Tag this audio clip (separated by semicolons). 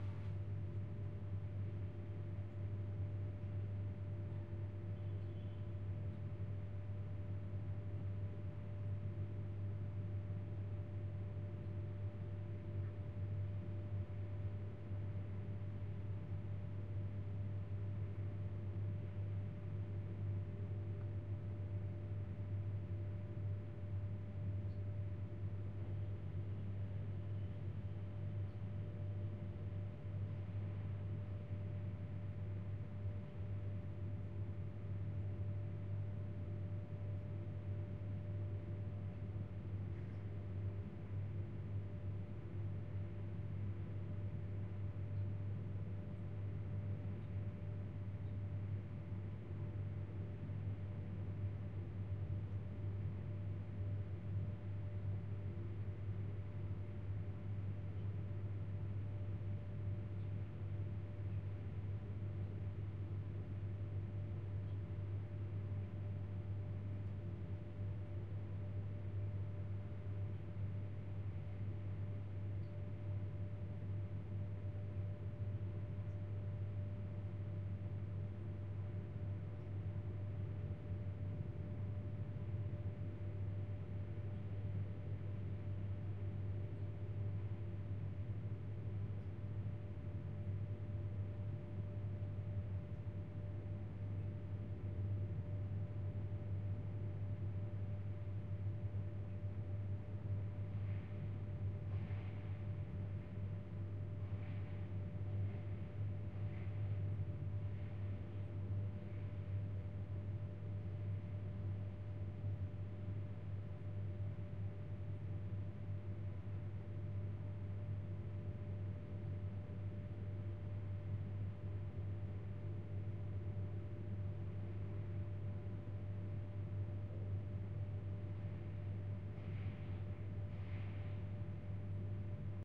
Ambience; Indoors; Industrial; Office; Room; Tone